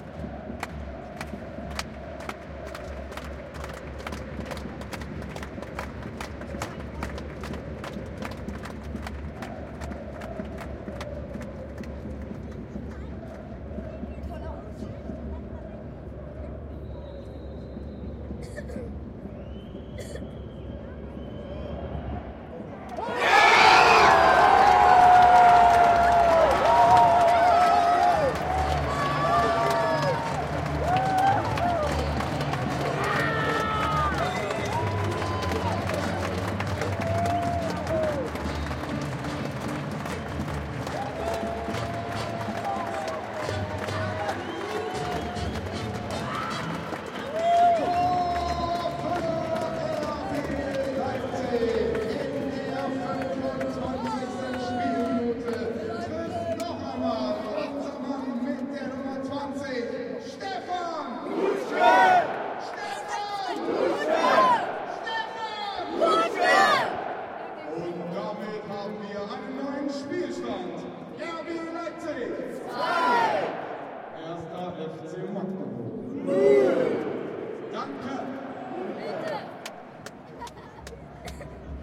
Field recording of the spectator's perspective of a German Regions' League football game in Leipzig's Red Bull Arena, on April 28th 2013. RB Leipzig is playing against FC Magdeburg. The recorder is situated amongst several spectators of all ages, several children are present and heard.
The clip starts of with spectators clapping and drumming rythmically, then you hear the referee whistle, and loud cheering suddenly starts. RB Leipzig has scored 2:0 against Magdeburg. James Brown's "I feel good is played on the P.A. in the distance, and the M.C. announces the player who shot the goal, along with the score, with the emphatic help of the audience.
Recording was conducted in the RB Leipzig fans' block using a Zoom H2, mics set to 90° dispersion.
rb-leipzig, field-recording, cheering, arena, chanting, football, stadium, audience, leipzig, sports, goal